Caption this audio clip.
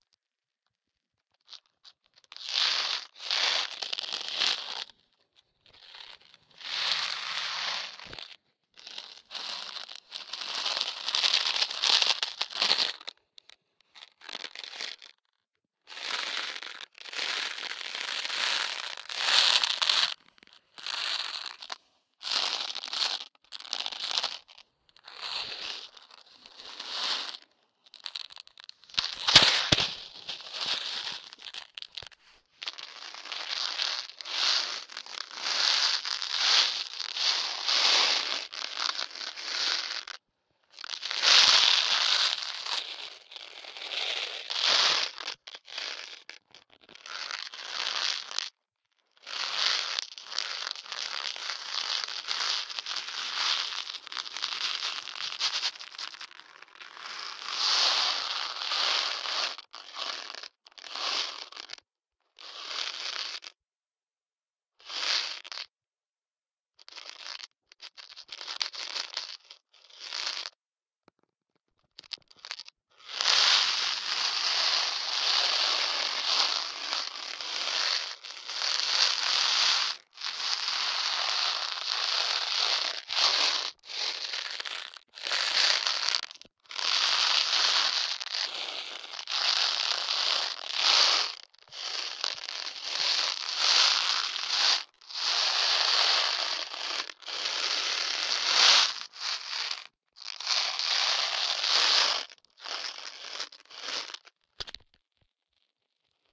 Stainless Steel Scrub Pad recorded with a piezzo mic